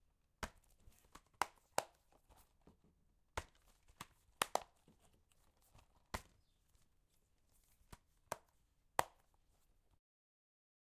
Blu-Ray case: Plastic clips, Blu-Ray case opening and closing. Soft and subtle sound, crisp. Recorded with Zoom H4n recorder on an afternoon in Centurion South Africa, and was recorded as part of a Sound Design project for College. A Blu-Ray case was used